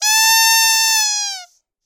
Squeaky Toy, high pitched, squeze, squeak, squeal, in, long-001
A rubber dog toy chicken being slowly squeazed to let out a long shrill squeal